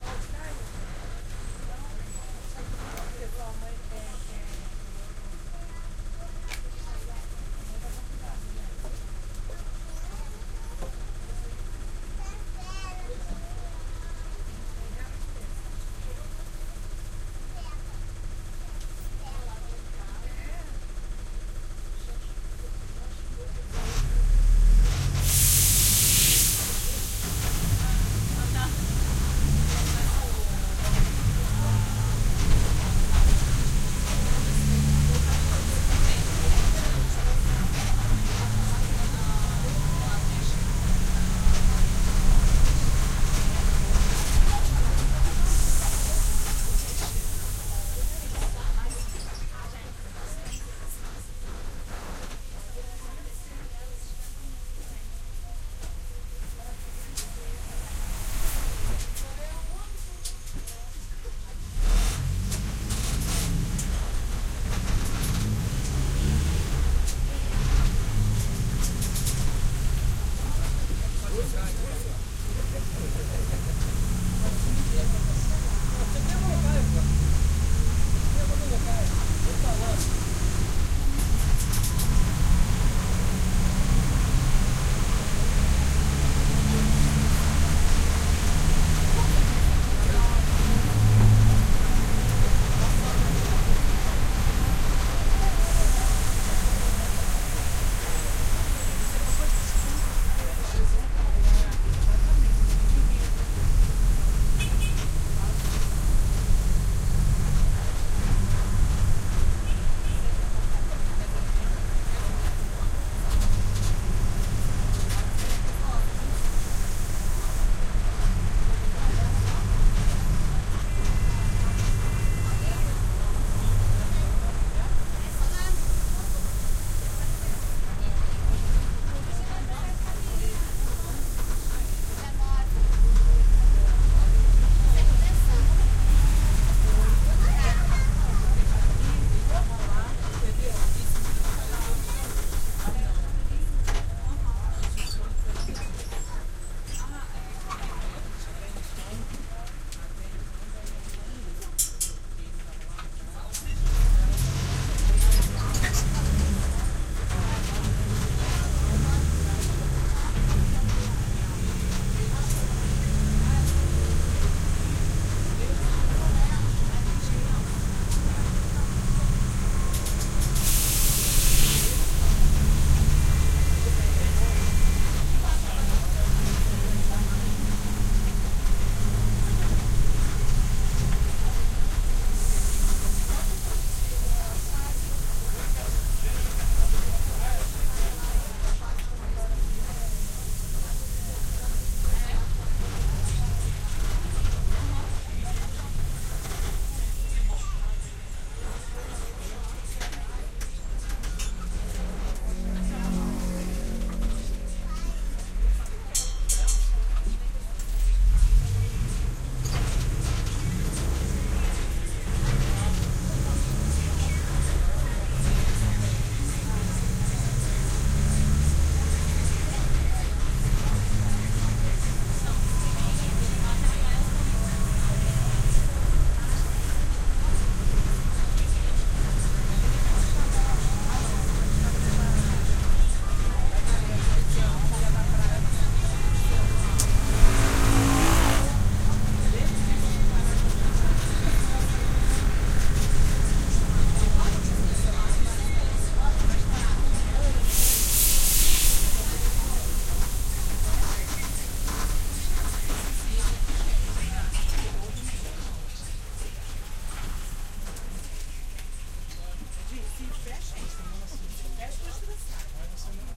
RDJ-Bus01
Interior of the bus nr.33 in Niteroi, Brazil. Few stops, crowd of people, traffic, creaking. Recorded with DIY binaural glasses and Nagra Ares-P.